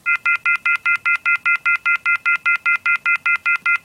The American off-hook tone, used to alert a user that the telephone has been left off-hook without use for an extended period, effectively disabling the telephone line.
off-the-hook, phone, beep, american, off-hook, tone, telephone